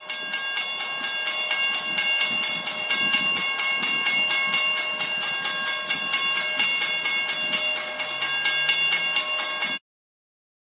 Train crossing in rural Texas. Recorded on iPhone 4s, processed in Reaper.